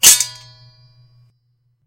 Swords Clash - High Quality #2
High Quality and pre-mixed Sword Clashing sound effect made by me.
Battle, Clash, Claymore, Fight, Medieval, Sabre, Sword, Weapon